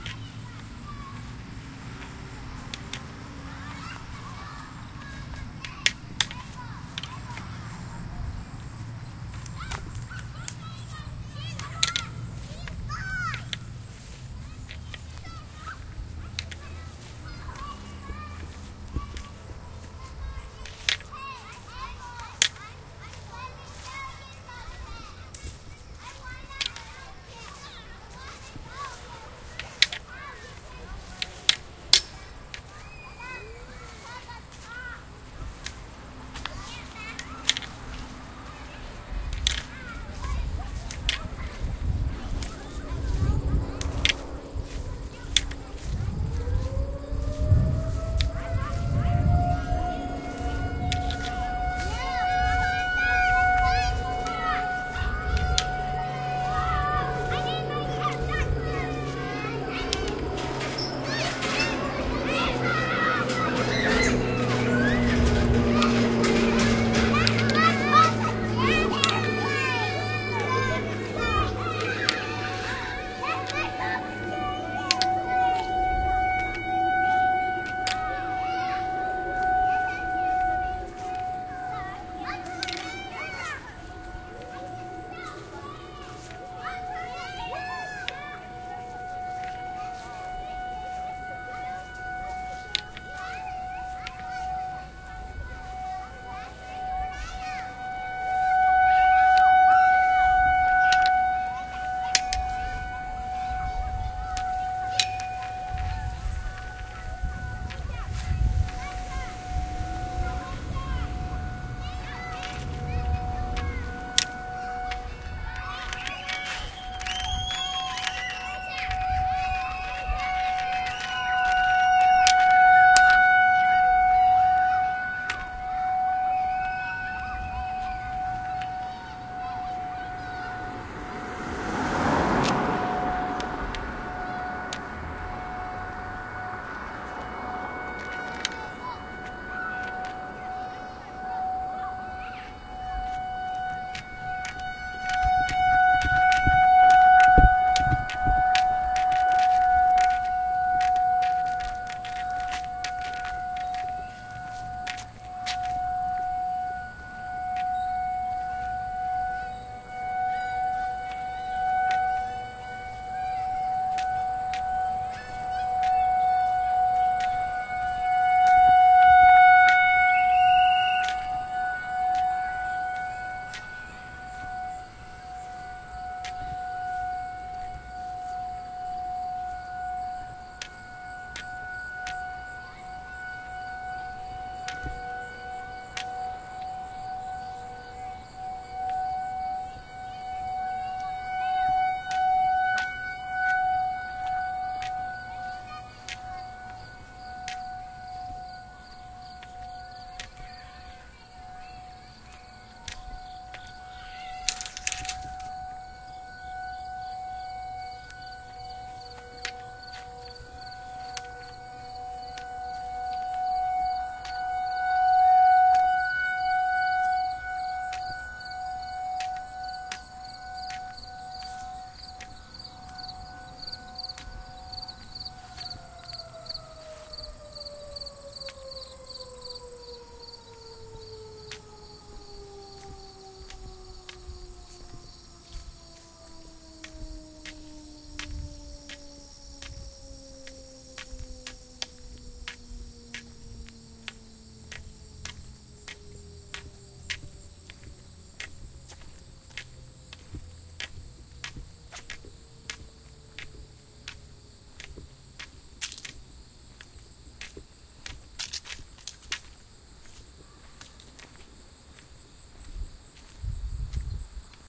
Late summer, 10:00 A.M. on the first Wednesday of the month. Slowly walking past St. Benedict's Elementary between 2nd and 4th. Kids playing as the last tornado siren test of the season goes off at 00:47. as the siren begins, a big empty-sounding truck or school bus goes by. At one point a kid yells tornado. Kids scream. At 02:51 as the siren makes a particularly loud sweep a teacher blows a whistle. Probably means recess is over. My long fiberglass NFB white cane hits various things, the sidewalk, a chain-link fence, light posts, leaves, and the curb at the beginning when I walk on soft ground between 2nd and 3rd where there is no sidewalk. Other traffic along brick streets can be occasionally heard. My mic jostles a bit when I quickly cross 3rd. I end the file a bit before I cross 4th. Recorded with Zoom IQ7 with IPhone SE2020 & Voice Memos, then edited from larger MP4 with Goldwave on the PC.